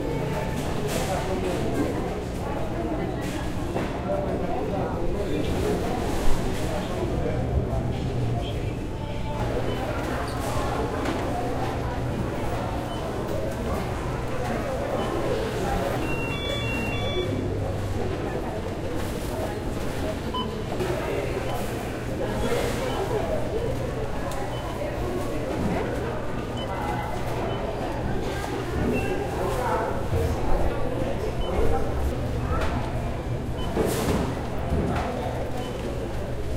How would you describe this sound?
gravacion de sonido de gente que esta en el mercado pagando en el caja de pagos
mercado, super-market